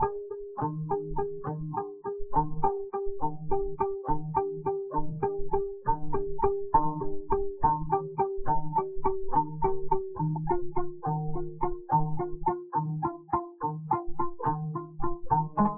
lacky box3
Recorded from a little 'lacky box' I made from a cardboard box and a few elastic bands.
string, riff, home-made, elastic